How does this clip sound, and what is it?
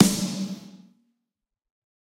Snare Of God Wet 031
drum, pack, realistic, set, snare, kit, drumset